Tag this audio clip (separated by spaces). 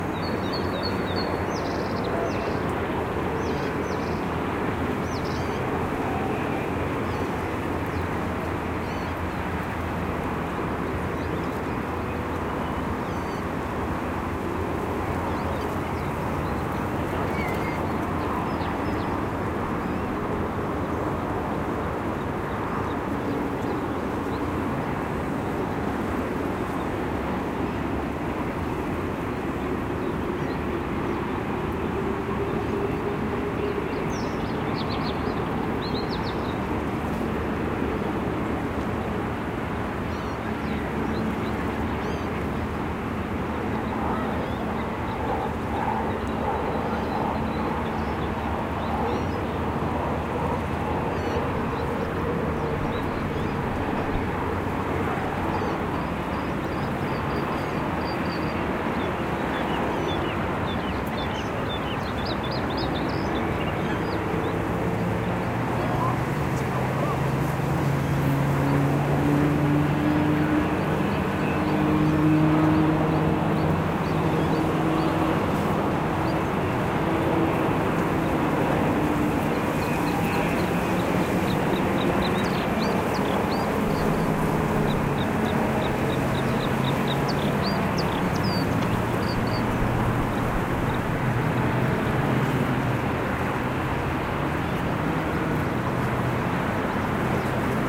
Omsk park Russia victory-park birds bird town hum traffic athmosphere cars noise field-recording city